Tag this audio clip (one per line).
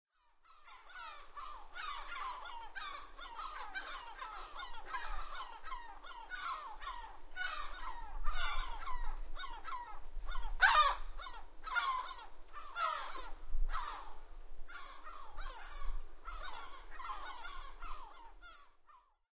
Portugal
Creative-Europe
field-recording
Port
water
seaguls
Alentejo
soundscapes
residency
Sines
seagul